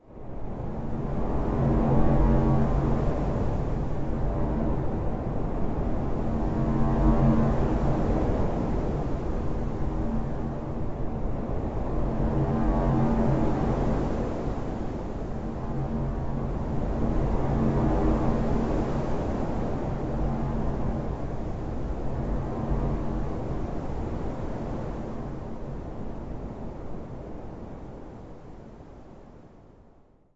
Some artificial wind mixed with a weird metallic hum. Feels wave-like. Made/Generated in Audacity.